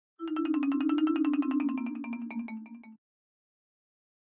A marimba with multiple effects applied